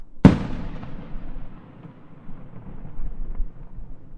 Firework single shot 2
New year fireworks
explosion, fireworks, firework